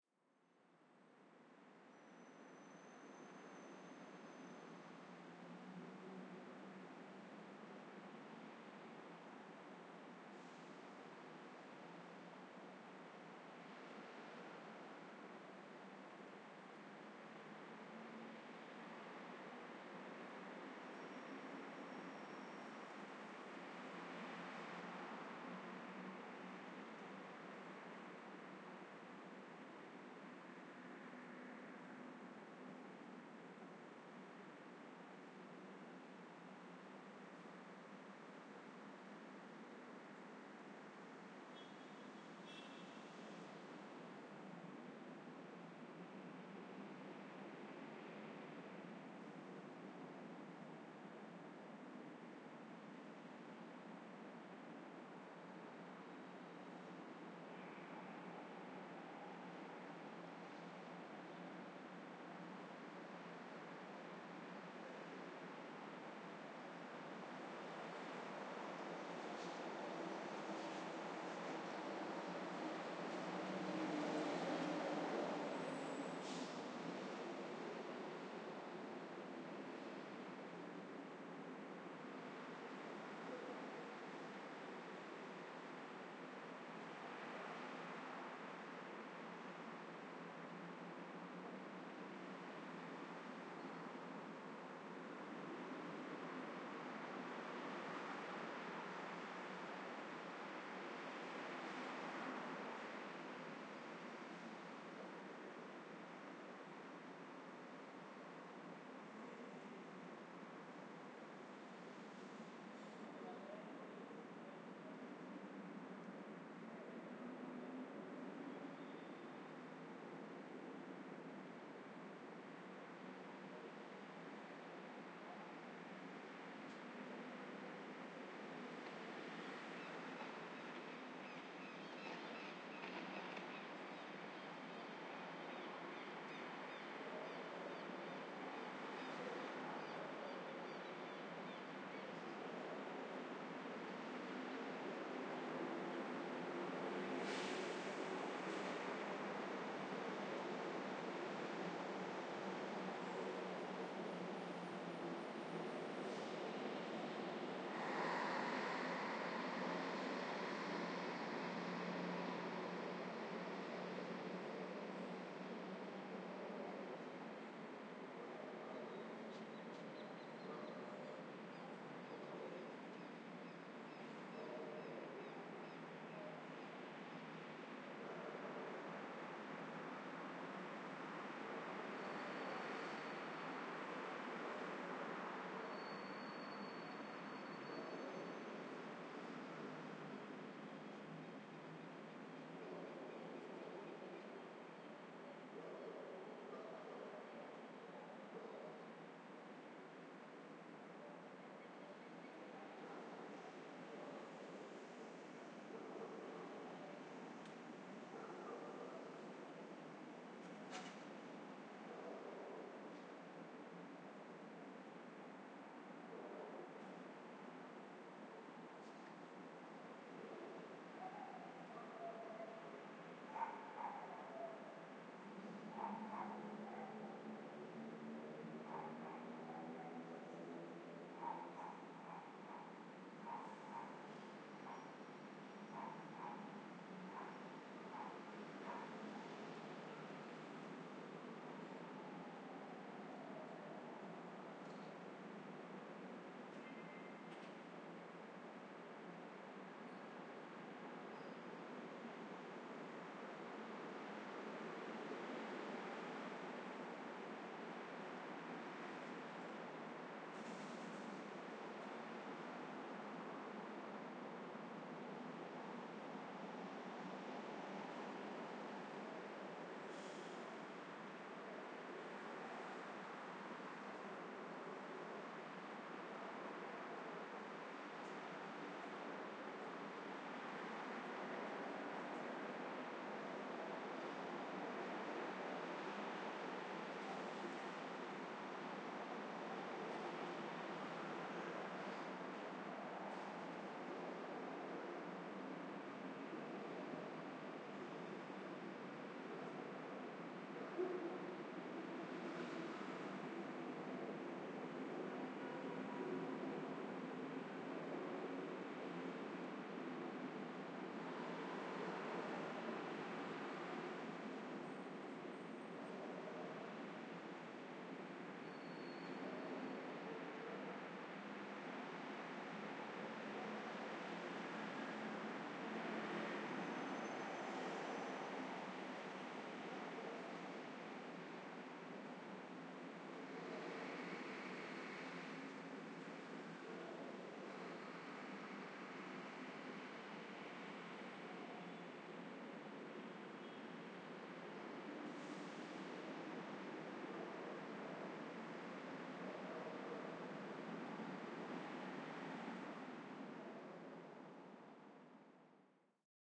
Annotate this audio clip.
White noise city captured from window at 1st floor
Sounds of traffic captured from window at 1st floor. Near highway light. Hour: 9PM.
Good for white-noise, useful like background for cinema.
Recorded with Tascam HD-P2 and pair of AT 4033a condenser mics in A-B way.
backgound-noise, white-noise, window, traffic, transit, apartment, background, film, cinema, stereo, night, city, cars, atmosphere, ambience